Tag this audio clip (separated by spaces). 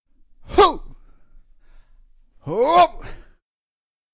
short exortion voice physical human vocal male wordless man